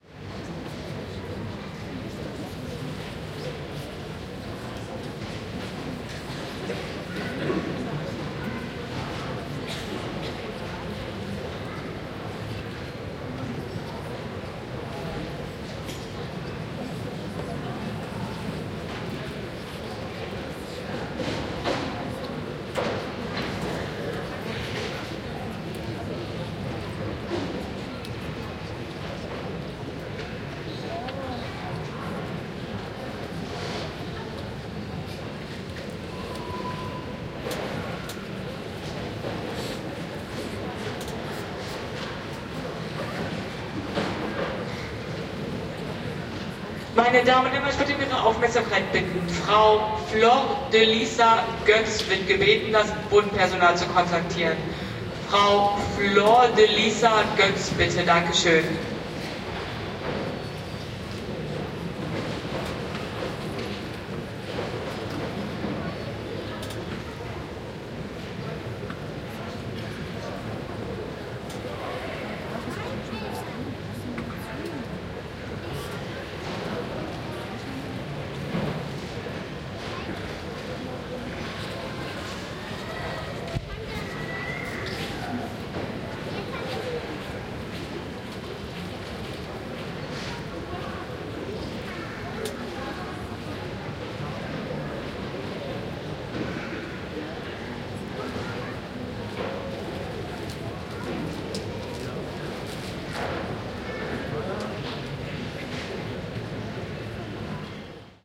SEA 1 Germany, Frankfurt, Airport, Terminal 2, Atmo (binaural)
Ambience at Airport Frankfurt/Main, Germany, Terminal 2
People talking, Announcement at 47s
binaural recording
Date / Time: 2016, Dec. 28 / 13h56m
walla, people, binaural